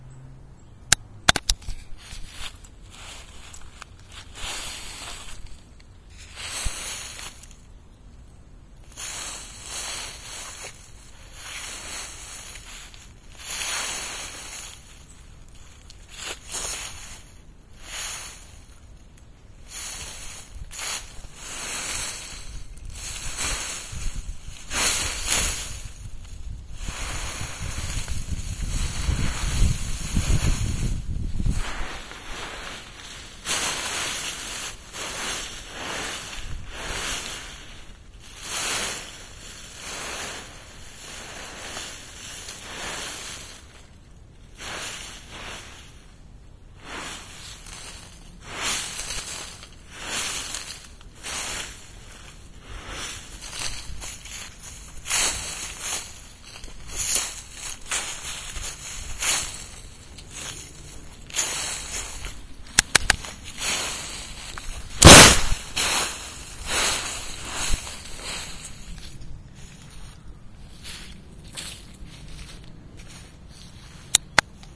Me running my hands and feet through dry leaves. The results aren't terribly satisfying but they made do for a radio play I needed them for.
Autumn, dry-leaves, Fall, kicking-leaves, leaves